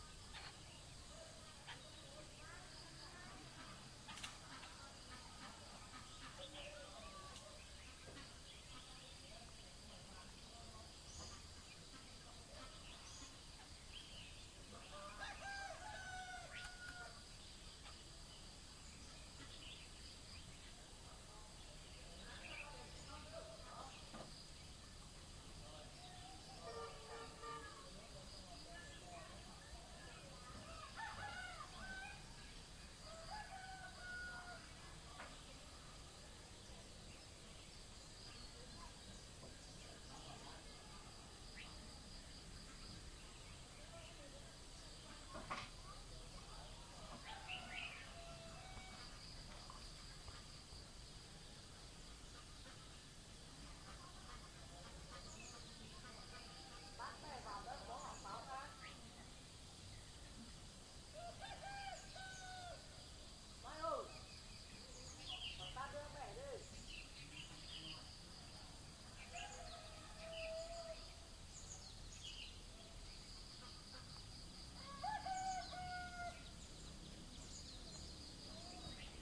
BR 010 China naturesounds
Ambience in the mountains near the frontier between China and Vietnam
You can hear insects and birds, some distant voices, roosters, and other kind of sounds.
Recorded in September 2008 with a Boss Micro BR.
crickets, China, Mountains, voices, ambience, village, Vietnam, birds, nature, field-recording, insects